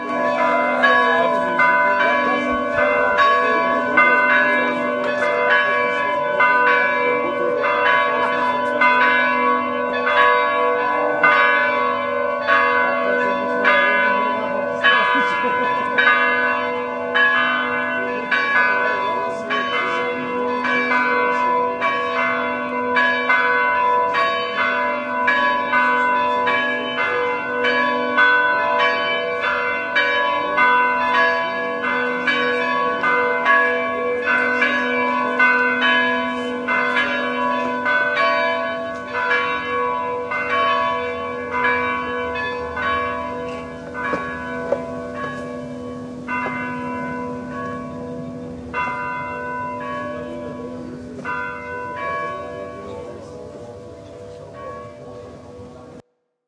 Church Prizren Kosovo
churchbells, Fieldrecording, Outside, village